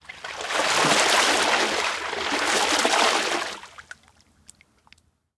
Water slosh spashing-8
environmental-sounds-research, splash, water